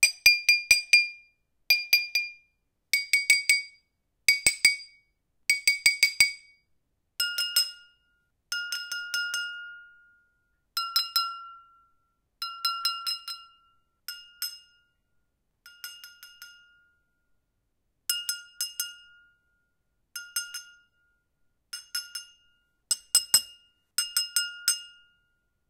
tapping glass
Empty and full glass dinging. Recorded with Zoom H2.
ding, glass, attention, toast, glasses